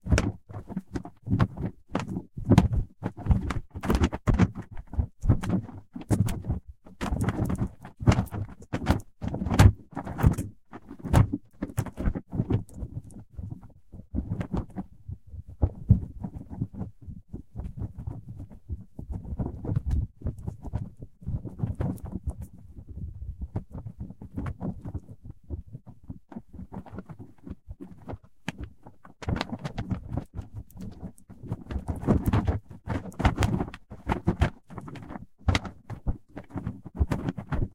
RAH Flapping Fabric 3
Recording #3 of my own shirt flapped, snapped, whipped, waved etc. If you pitch-shift this down (or slow it down) it can sound (IMO) indistinguishable from, say, a large flag or large sail being pulled and snapped in the wind.
This had recording noise removed, and silences auto-trimmed, with auto-regions from that trimming generated and saved in the file (handy for selecting a sound region easily or exporting regions as a lot of separate sounds).
boat, snap, slap, wave, cloth, whip, flag, flap, sail, fabric